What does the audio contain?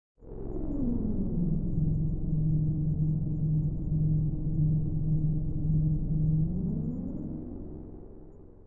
Alien Spacecraft 3
A collection of Science Fiction sounds that reflect some of the common areas and periods of the genre. I hope you like these as much as I enjoyed experimenting with them.
Alien, Futuristic, Machines, Mechanical, Noise, Space, Spacecraft